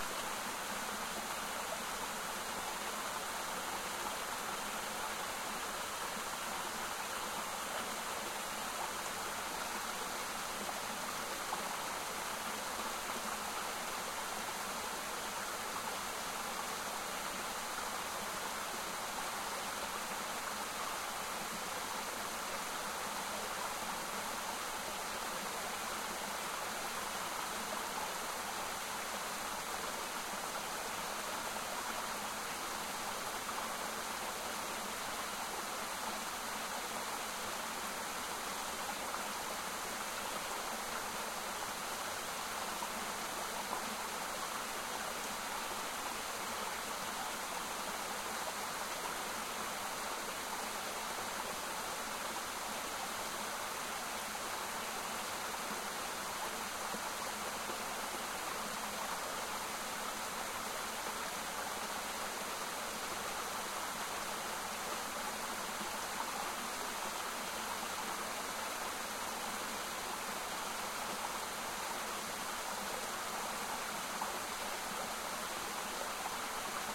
Babbling brook in the forest, from the bridge. This sample has been edited to reduce or eliminate all other sounds than what the sample name suggests.